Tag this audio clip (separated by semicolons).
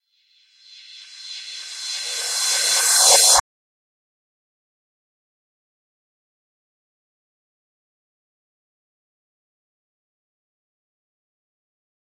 cymbal; metal; fx; echo; reverse